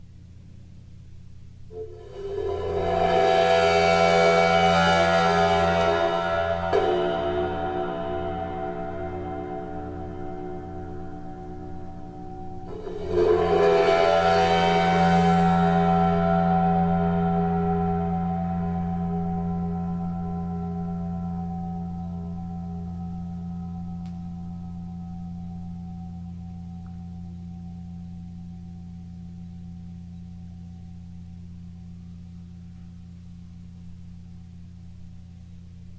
Cymbal Swell 107 W:creaky knuckles
bowed cymbal swells
Sabian 22" ride
clips are cut from track with no fade-in/out.
atmosphere, ambiance, soundscape, bowed-cymbal, ambient, overtones, Sabian